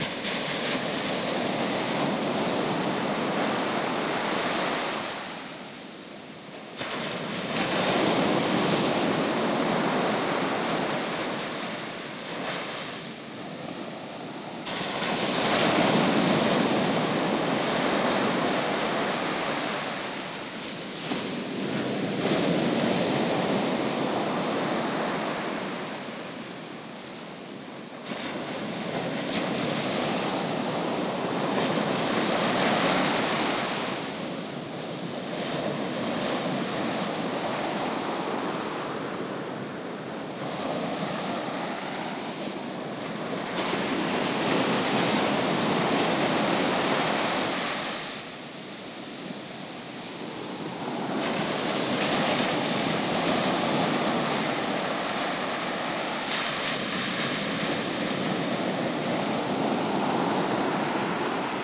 FL beachwaves
Waves crashing on an east-coast FL beach. The ocean was relatively calm, so the waves were not very big, about 3ft max. Recorded on iPhone 4S internal mic and amplified ~10dB with Audacity.
waves, beach, FL, nature, waves-crashing